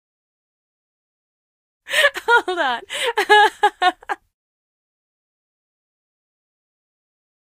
Woman Laughter-Tickled Saying Hold On!
Authentic Acting of Laughter! After hearing something hilarious over the phone: Check out our whole Laughter pack :D
Recorded with Stereo Zoom H6 Acting in studio conditions Enjoy!